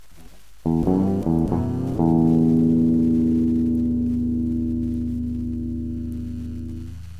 Playing with slide guitar. Directly plugged into soundcard with no preamp
slide, guitar